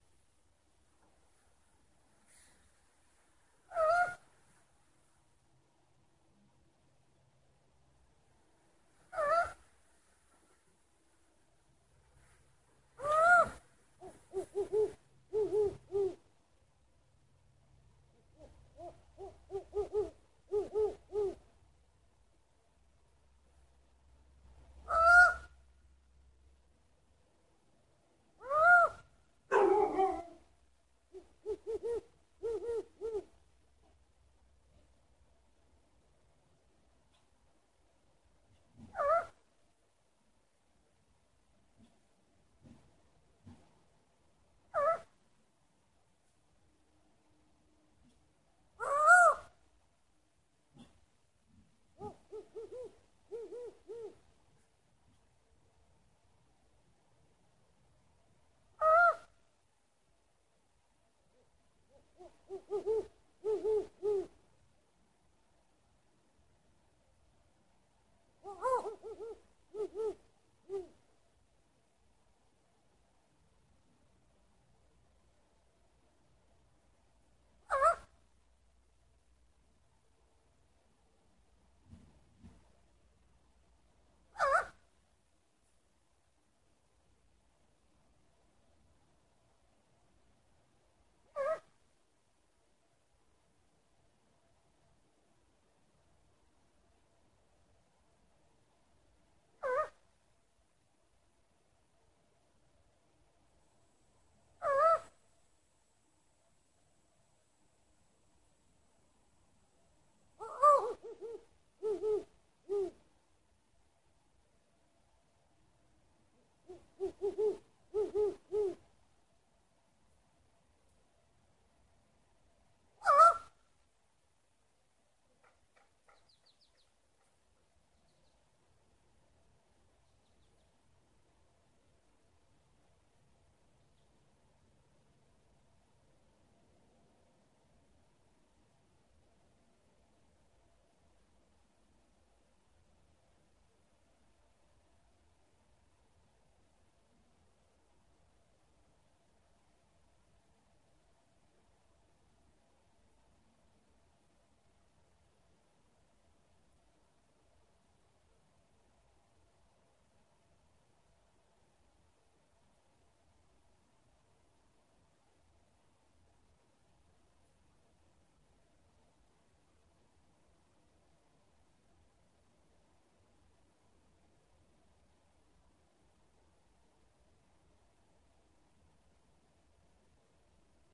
A pair of owls stationed themselves outside my half-basement bedroom window in Colorado early this morning around 12am. Unfortunately I only had my phone to capture them with, but it still turned out alright, save for the periodic VOX-like breaks when silencing background noise.
The hoot is obvious as to the source, but I'm unsure about the screech/cry that occurs with equal frequency. Is it the response of a mate? Or just the yip of a curious neighbor dog?